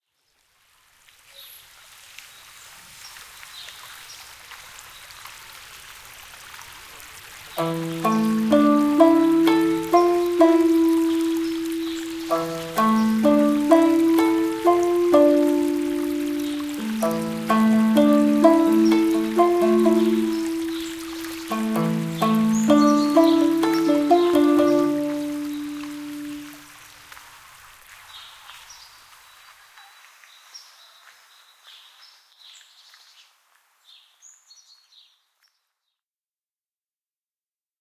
Oriental Garden Intro
An oriental intro track with the sound of birds, water, and music from a shamisen.
shamisen, nature, garden, oriental, birds, meditation, music, calm